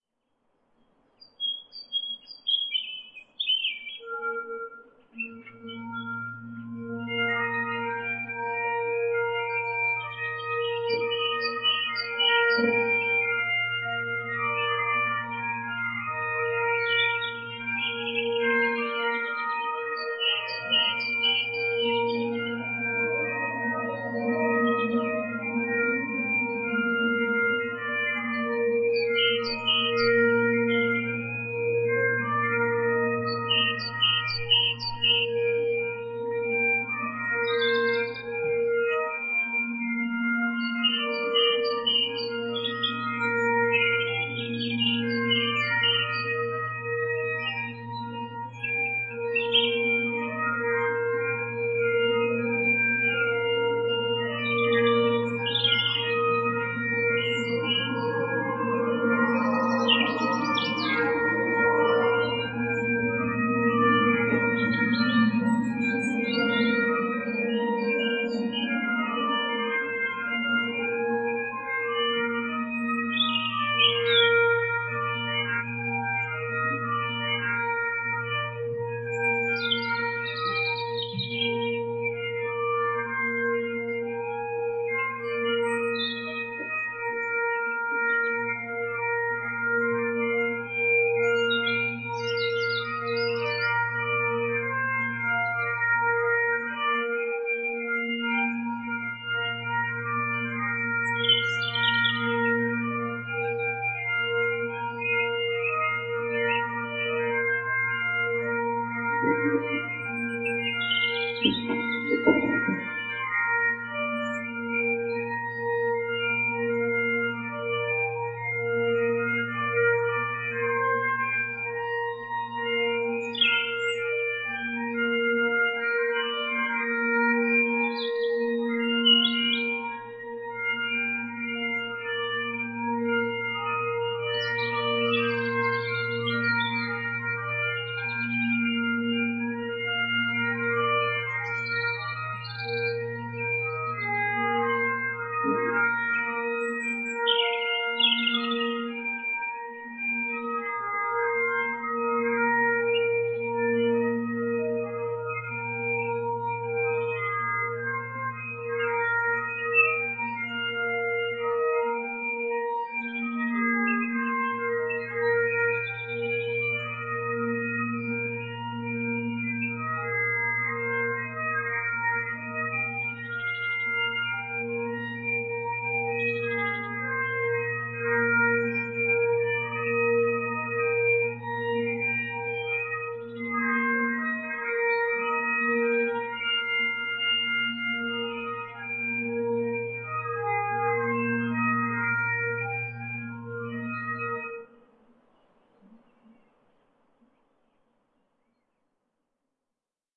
Lone Piper Outdoors
A dual mono field-recording of a lone piper practicing with highland pipes in my neighbour's garden (he repairs pipes and makes/tunes reeds for many types of bagged pipes) while Allan was reeding his uilleann pipes. Recorded from about 150m distance.. Rode NTG-2 > FEL battery pre-amp > Zoom H2 line in.
pipes, piob-mhor, outdoors, mono, birds, bagpipes, highland-pipes, field-recording, pibau-mawr, piper